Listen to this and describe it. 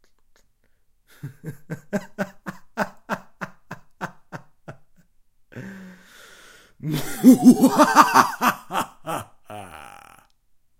Evil Laugh 1
Evil Villian laughter